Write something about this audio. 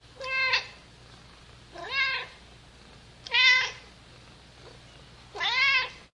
mocha meow
Fat cat Mocha is back, bigger then ever and meowing like hell recorded with DS-40 and edited in Wavosaur.
cat, meow, obese